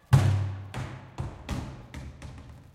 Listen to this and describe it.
Dropping Trash Can in Pool.2
One, alive, recording, Commodore